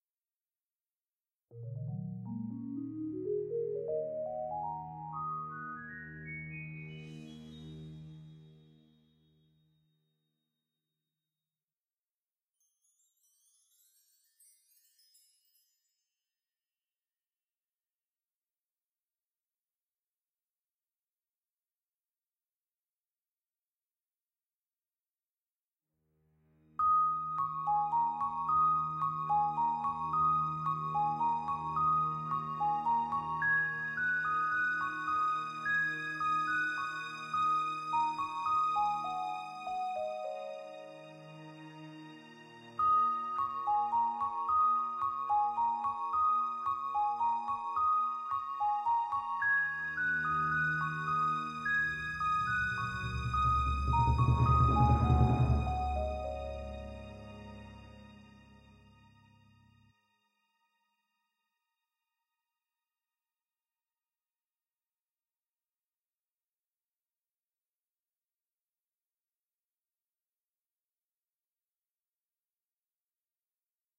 Piras0intro
Mistyc sound for intro.
Made with Propellerhead reaso for cronache di narnia
NARNIA, INTRO, BELLS